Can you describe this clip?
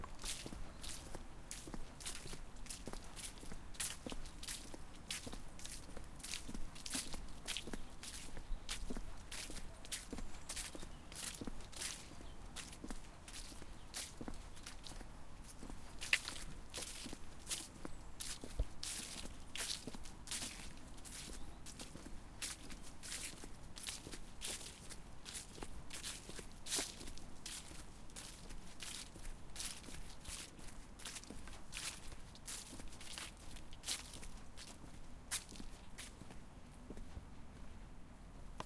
Footsteps on path - some fallen leaves
Walking down a public footpath in the country side